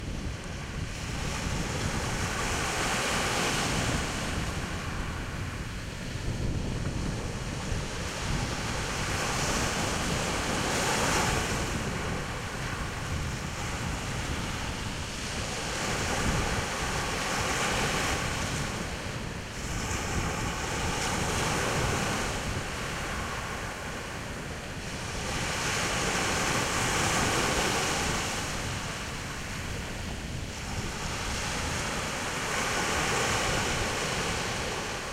Small ocean waves breaking at the coast of Sant Adria de Besos Catalunya
field-recording, Camera-Sony-HXR-NX5